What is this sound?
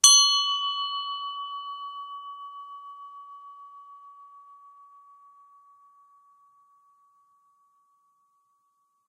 An old hand bell recorded using an AKG Perception 200 microphone at close range and a Roland SP-404sx. This is a single stroke of the bell, capturing lots of ringout. I used Audacity to remove ambient noise from my studio to provide a clean sound. I believe the bell was used for signaling the start of school many years ago. It is marked B 39.